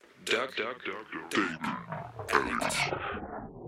Enjoy this sample from DucTape Addict, one of my productions!
Cleaned and processed; recorded with an AKG Perception 200